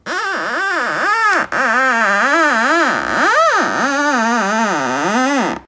sounds produced rubbing with my finger over a polished surface, my remind of a variety of things